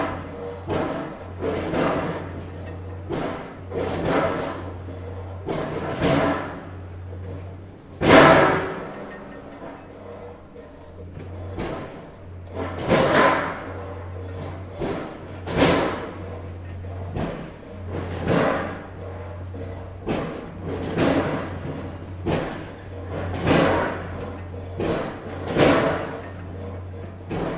I made this field recording next to a construction site in Greenwich (London) with a H4N